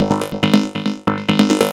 Synth Loop 01
Synth loop made in FL Studio (:
Synth, Loop, Arp, Arpeggiator